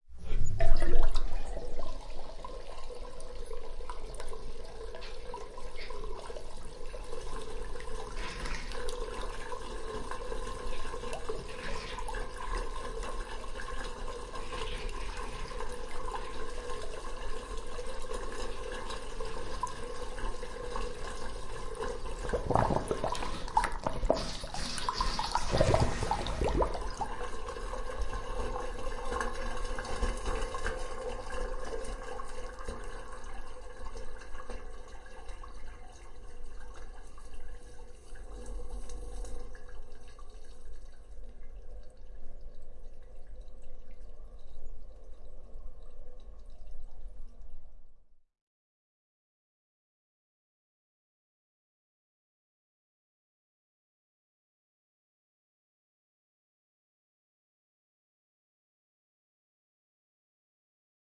Sound of draining water with the blubs.